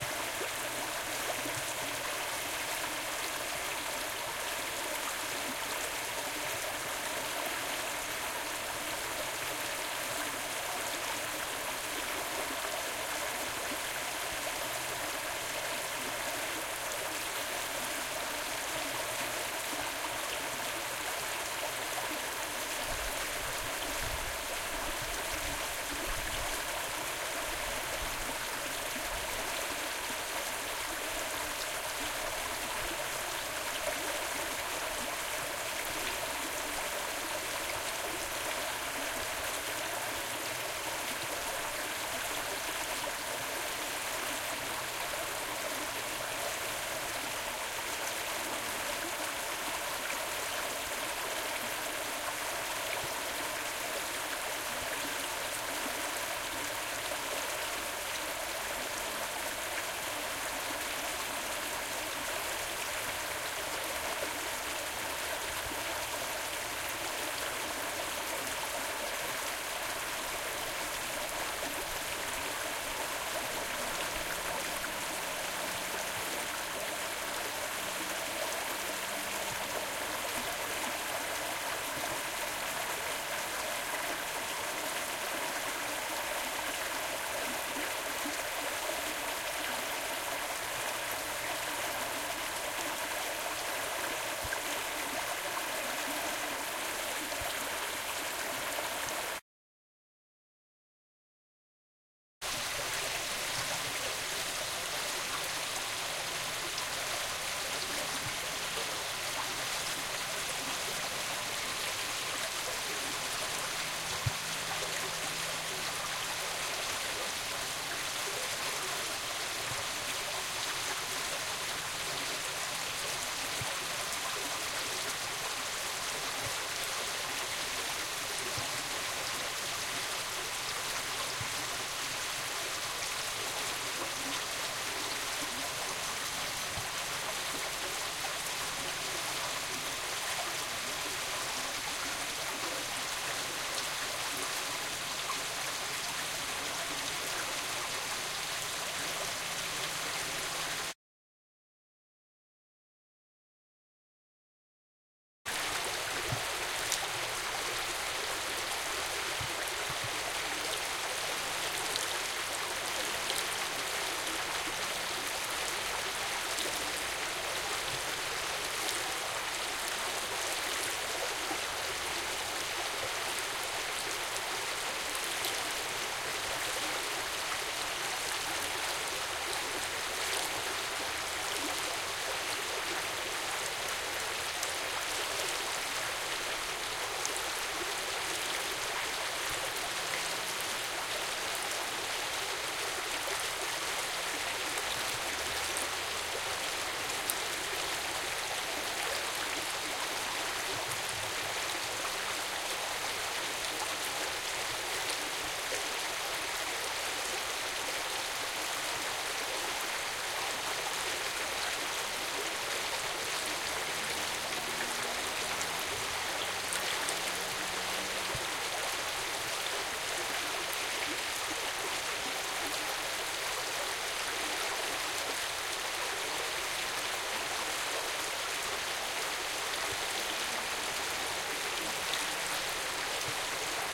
EXT small stream 3POV MS
Quad recording. Back MS from Zoom H2. 3 different perspectives of a small stream running. near dusk. 3 POVs are medium, close under wooden footbridge, closeup on water.
flowing, water, creek, stream, brook